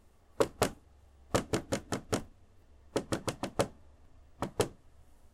Various speeds of knocking on wood.
crash, Knocking, smack, wood